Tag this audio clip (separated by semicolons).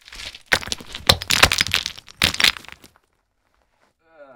rocks; tumble; dropped